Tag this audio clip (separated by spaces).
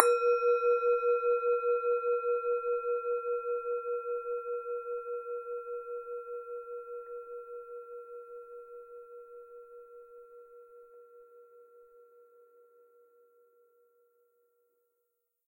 bell meditation midfullness spiritual tantra tibetan tibetan-bell zen